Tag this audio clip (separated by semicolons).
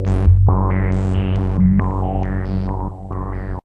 analog; vcf; roland; synthesizer; sh-5; sample; hold